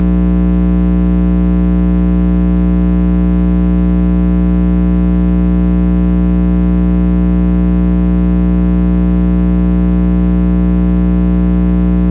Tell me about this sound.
Phone transducer suction cup thing on various places on an alarm clock radio, speakers, desk lamp bulb housing, power plug, etc. Recordings taken while blinking, not blinking, changing radio station, flipping lamp on and off, etc.